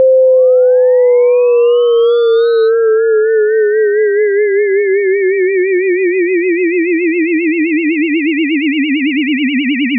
Generated with Cool Edit 96. Sounds like a ufo taking off. Frequency actually C5 I believe. Accidentally overwrote file names... oops.
mono, multisample, tone, ufo